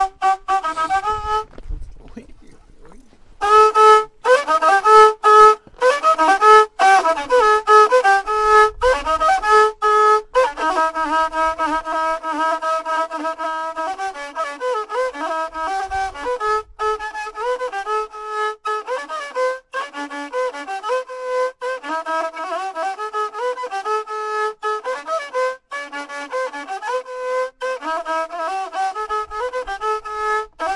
Old guy playing the rebab at Aiht Bennhadou, Morocco.
Morocco, Islam, rebab, Instrument